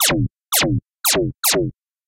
Just some lazarsss. I used Abletons Operator for this.
ableton, operator, synth